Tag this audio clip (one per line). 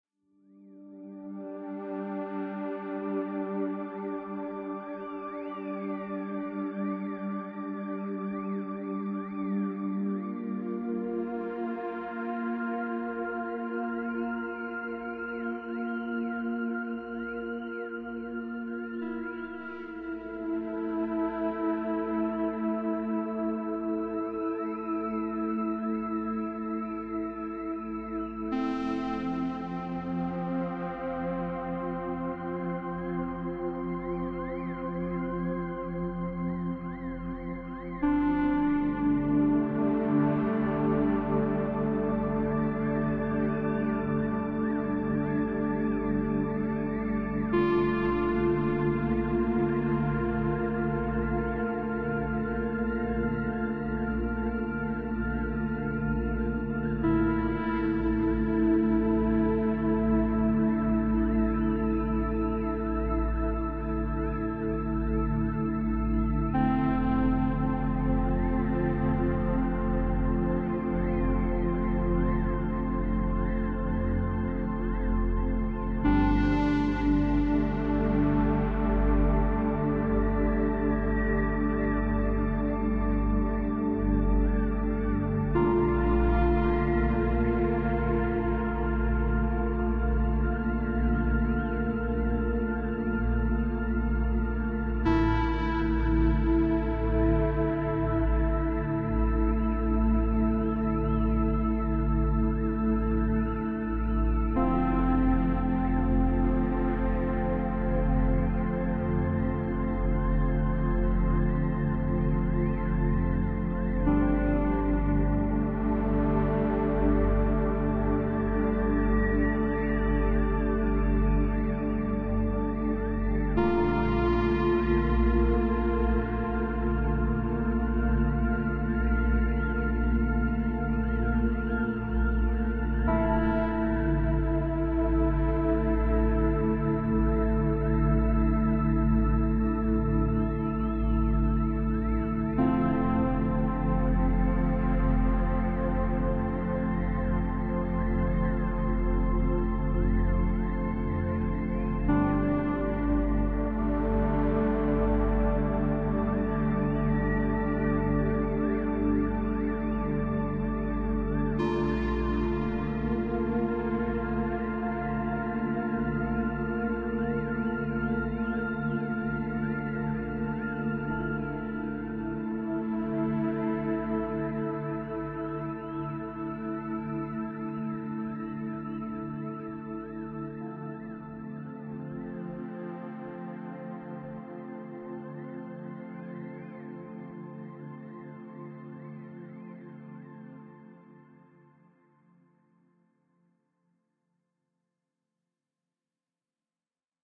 downtempo
music
classical
relax
drone
experimental
melodic
space
atmospheric
chillout
chill
electronic
background
deep
abstract
instrumental
emotional